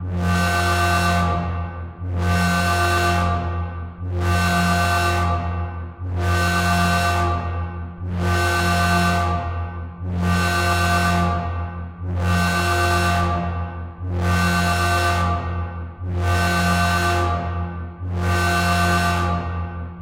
I´ve experimented a lil bit with the new halion 5 (an amazing sampler ;) ). I think this alarm sounds pretty nice. If you wanna use it for your work just notice me in the credits
Dimebag